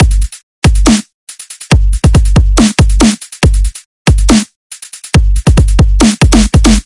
Dubstep Drums #1
Dubstep Drums 140BPM
140BPM, Drums, Dubstep